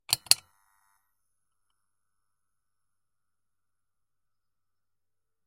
Creation date: 16 - 06 - 2017
Details of this sound:
Subject of recording:
- Object : Bedside lamp switch
- Material : plastic
- peculiarity : Button + sizzles of bulb
Place of capture:
- Type : Inside
- resonance : None
- Distance from source : 20 cm / 1 lien
Recorder:
- Recorder : Tascam DR-40 V2
- Type of microphone used : Condenser microphone
- Wind Shield : none
Recording parameters:
- Capture type : Mono
Software used:
- FL Studio 11
FX added:
- Edison : To amplify the signal and to suppress parasites